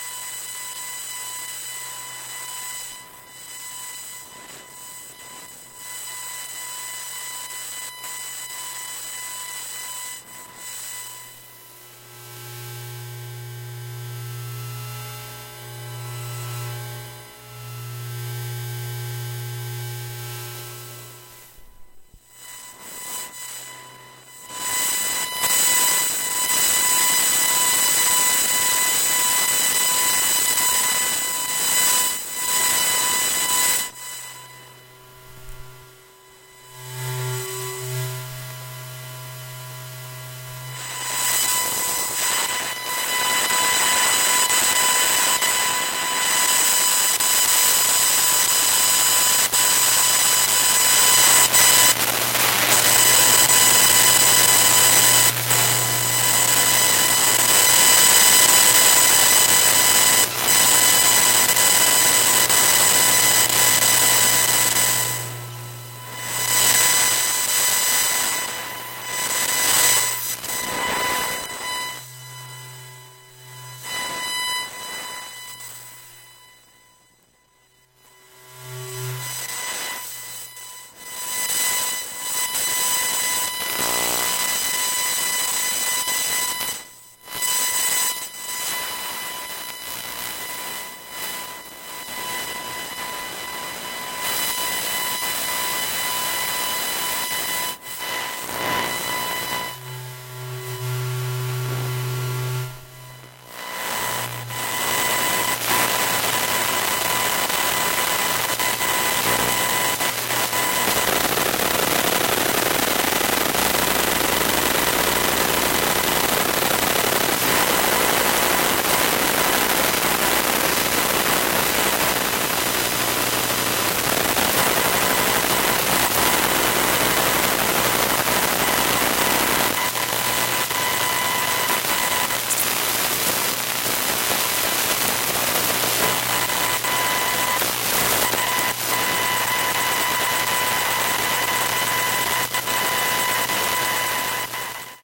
Electromagnetic Mic on Laptop
I recorded some internal workings of different things, the laptop working being one with an electromagnetic telephone pickup coil.
internal, electronics, buzzing, humming, noise, electrical, electrics, laptop, buzz, electromagnetic, hum